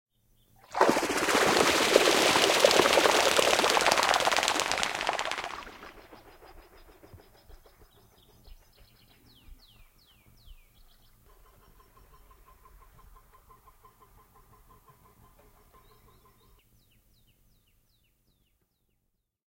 Lintu, lentoonlähtö vedestä, siivet / Two birds, takeoff from the water, wings splashing water
Kaksi lintua lähtee lentoon vedestä, siivet läiskyttävät vettä.
Paikka/Place: Suomi / Finland / Janakkala, Sorsalampi
Aika/Date: 20.05.1997
Finnish-Broadcasting-Company, Suomi, Finland, Takeoff, Soundfx, Tehosteet, Lintu, Bird, Siivet, Flap, Field-Recording, Yleisradio, Yle, Lepattaa, Wings, Vesilintu, Waterbird